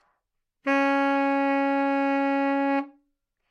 Part of the Good-sounds dataset of monophonic instrumental sounds.
instrument::sax_baritone
note::E
octave::2
midi note::28
good-sounds-id::5299